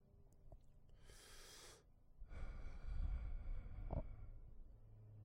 Taking in a breath